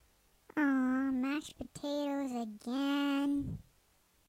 I made this sound to sound like a little picky kid eating dinner.